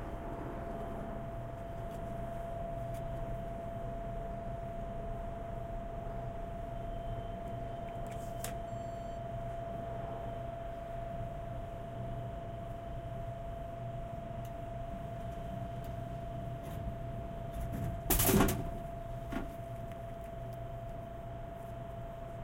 Soda Machine Bottle Drop (Binaural)
(RECORDER: ZoomH4nPro 2018)
(MICROPHONES: Binaural Roland CS-10EM In-Ear Monitors)
As these are recorded using binaural in-ear mics, I purposefully attempt not to turn my head to keep the sound clean and coming from the same direction. Of course, if you don't want Binaural audio you can always easily convert to mono.
This is a recording of me approaching a soda machine in my apartment complex, swiping my credit card in the new, handy-dandy credit card slot, and dispensing a Coke Zero (in case you REALLY needed to know).
Safe for all production as it is voice free.
I kept the peaks very low to maintain a natural sound.